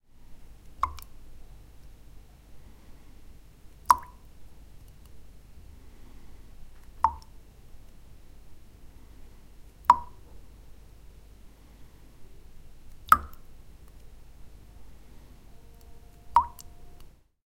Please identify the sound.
Water splash & drops 3
Water dropping and dripping into a glass.
Water, glass, drops, drop, droplets, foley, splash, dripping